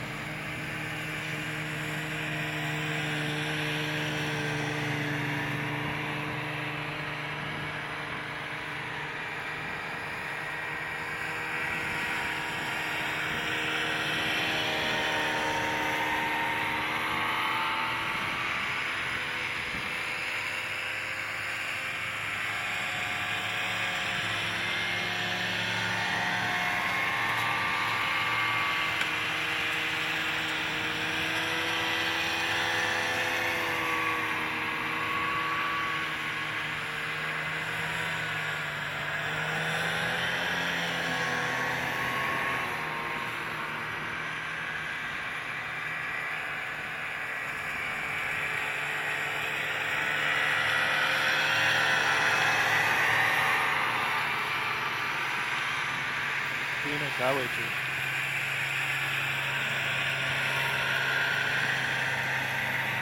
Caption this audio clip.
snowmobiles pass by far1
snowmobiles pass by far
by, far, pass, snowmobiles